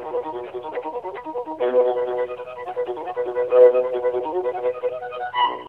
Orchestral Phone Message 6
recorded on a phone, mysteriously, as a message on my answering machine. I have no knowledge as to who recorded it, where it was recorded, or whether it came from a live performance or not. All of the segments of this set combine sequentially, to form the full phone message.
cello, cheap, viola, dirty, violin, bass, glitch, orchestral, glitchy, phone, recording, message, bad